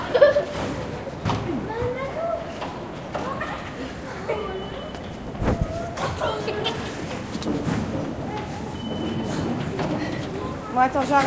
Second recording of the exit doors in the Paris metro.